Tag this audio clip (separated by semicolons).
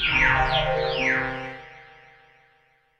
elect; lazer; tro